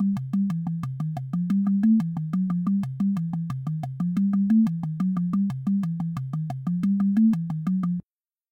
Muster Loop
120bpm. Created with Reason 7
toms, drumloop, percussive, drums, roto-toms